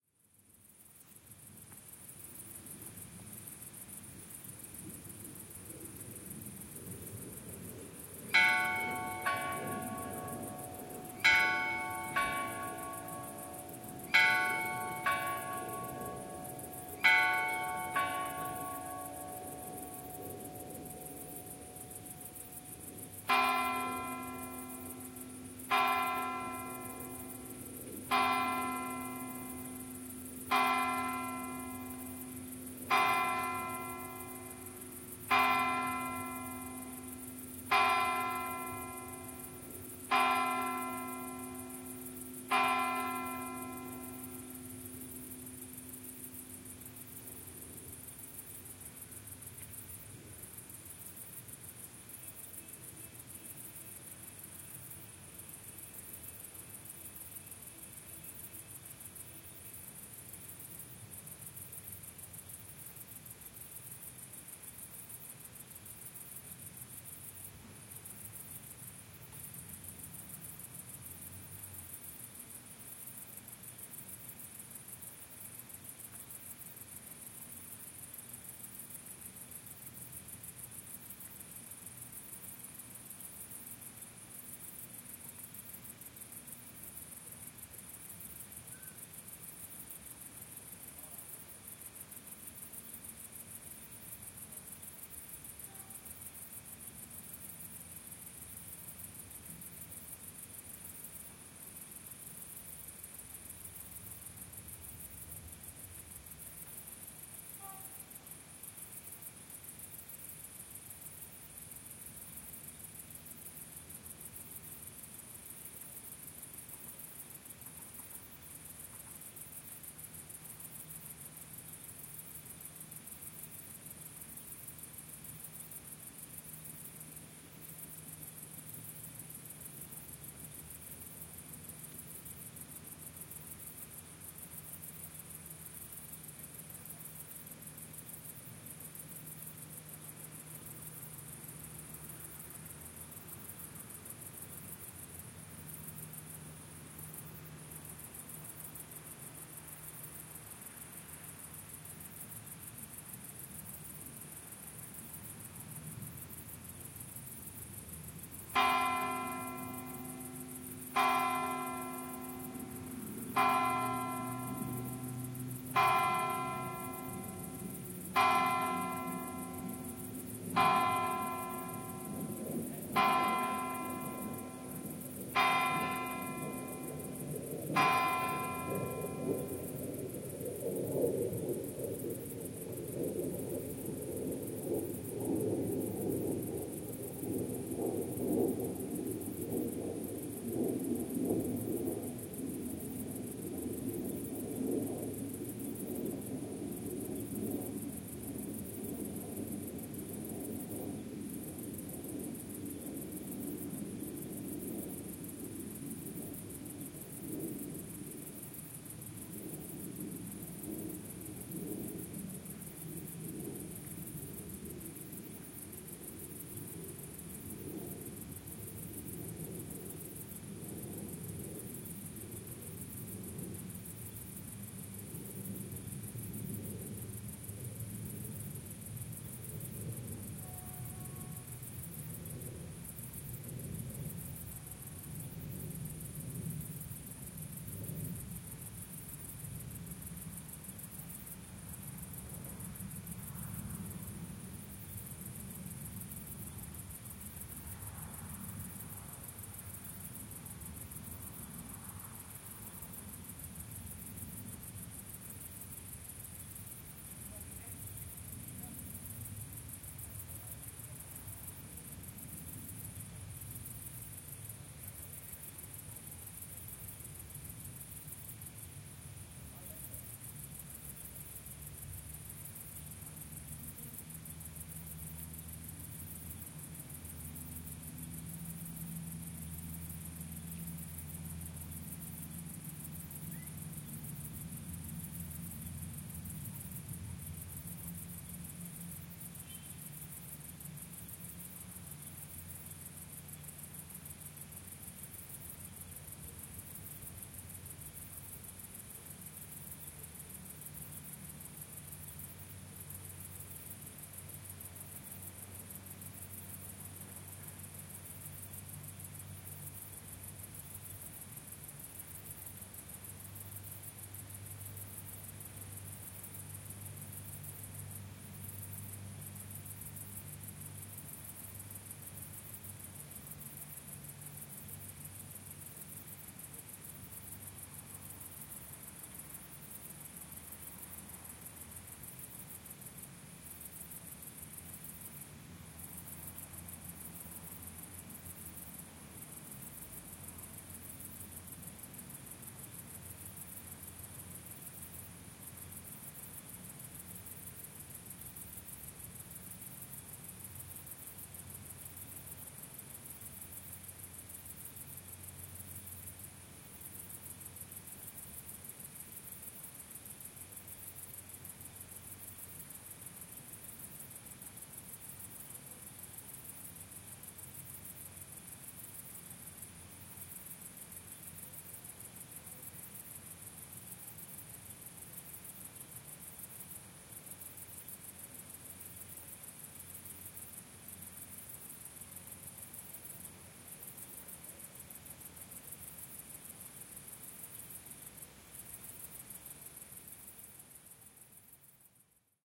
EXT Siguenza, Spain NIGHT: Mountain sounds, Cathedral Church bells, crickets, birds, distant airplane, minor vehicle sounds
This is a recording on the hilltop across from the historical town of Siquenza, Spain at 9:00pm in September 2019. The recording contains nature sounds, crickets, light airplane noise and some minor industrial noise from the town.
This is the longer recording that various other clips were made from.
Recorded with Shure MV88 in Mid-side, converted to stereo.
travel, outside, background-sound, church, bells, background, cathedral, night, atmos, atmospheric, atmo, nature, Siguenza, ambiance, field-recording, Spain, crickets, mountain, atmosphere, ambience, soundscape, ambient